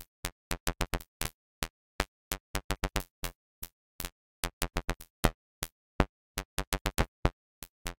tuby bass horn glitchy electronic f e g f 120bpm-04 in crispy
tuby bass horn electronic f e g f 120bpm glitchy
120bpm, tuby, electronic, bass, glitchy, horn, loop